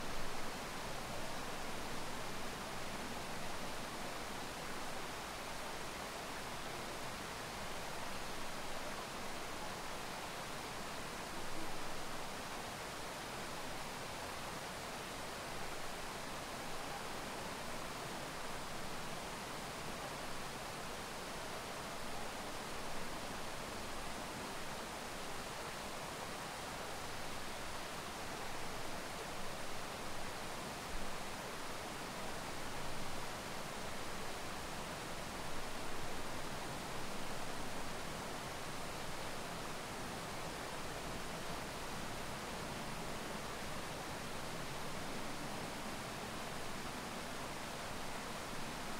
LowerFallsMultnomah Falls
A recording of the lower fall at Multnomah Falls as water is falling over the edge.